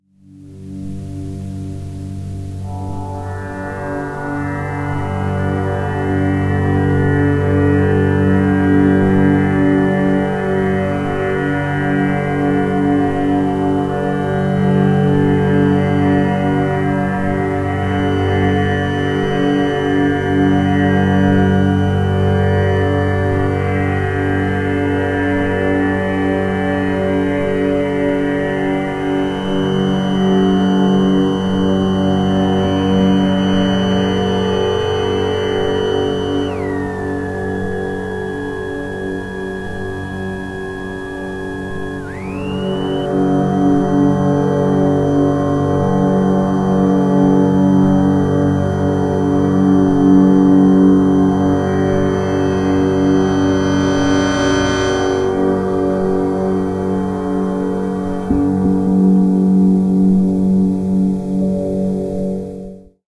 aeolian guitar played with hair drier